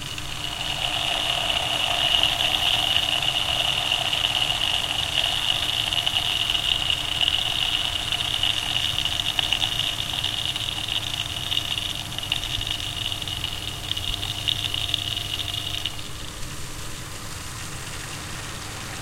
Pressure Meter Pipe Noises 2
Recorded in a high concrete central heating room of a one hundred year old former school building in Amsterdam, near several pipes with pressure meters on them.
heating, pipe, flow, system, air, mechanics, pipes, building